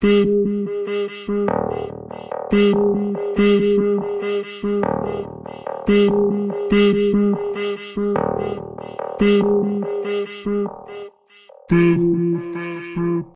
Back Tracking(No Drums)
Me forgetting something and backtracking my steps